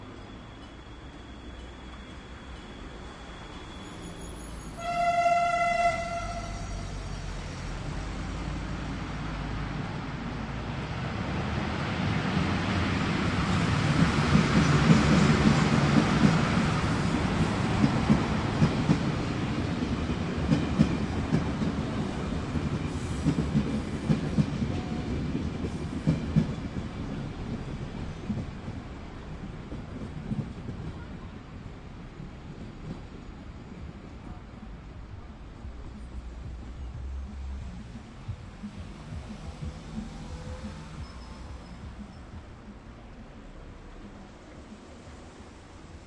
Tower Train RoadTraffic--16
train is coming and "flying" near shopping centre, there is also some cars near, te alst one with louder music
traffic, car